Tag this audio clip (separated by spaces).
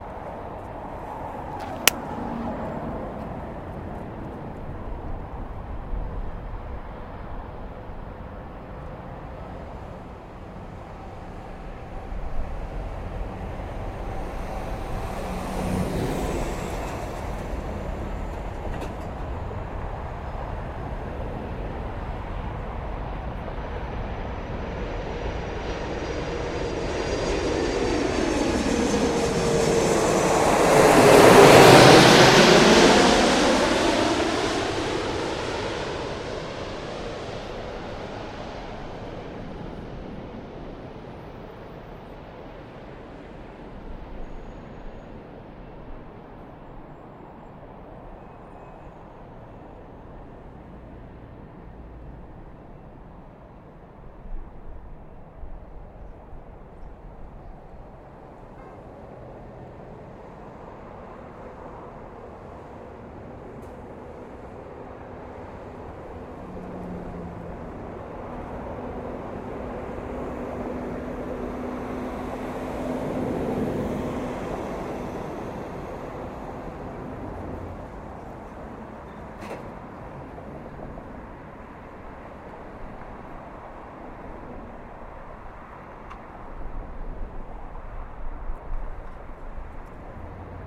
aeroplane airbus aircraft airplane airport angeles aviation boeing engine engines flight fly-by jet jet-engine landing launch los plane runway take-off takeoff